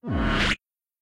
a spacey swoosh with darker freq's